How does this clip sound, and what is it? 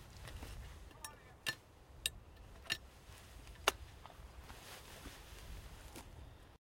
Loading side by side 1
Sounds taken from a shooter loading his side-by-side before the shoot begins.
cartridge, cartridges, clinking, clothing, gun, gun-sleeve, loading, over-and-under, pheasants, rustling, season, shells, shooting, shot, shotgun, side-by-side, sleeve